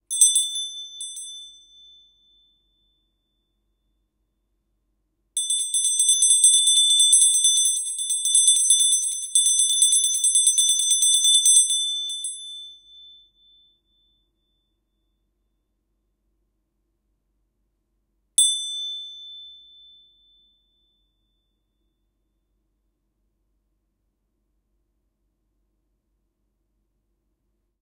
A beats of small bronze bell. The bell painted with oil paint.
See also in the package
Recorded: 03-02-2013.
Recorder: Tascam DR-40
ding, ring, small-bell, ringing, metallic, bell, ting, clang, bonze-bell